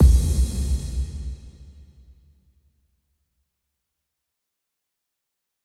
A simple but effective boom kick to add tension to those euphoric breakdowns and filtering melodies
Electric-Dance-Music, Hardstyles, Drums, Electric, UK-Hardore, Crash, UK-Hardcore, Kick, Dane, Boom-Kick, EDM, Hard-Dance, Sample